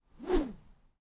Raw audio of me swinging bamboo close to the recorder. I originally recorded these for use in a video game. The 'C' swings are much slower.
An example of how you might credit is by putting this in the description/credits:
And for more awesome sounds, do please check out my sound libraries.
The sound was recorded using a "H1 Zoom recorder" on 18th February 2017.